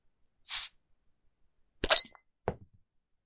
Opening a Beer Bottle - 2
Opening a glass of Guinness/ beer bottle.
water, glass, coke, bottle, pub, alcohol